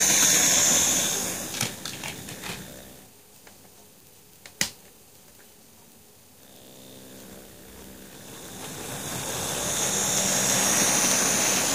Toy Train Couple Up
A toy train backing into three trucks, coupling up, and shunting them away.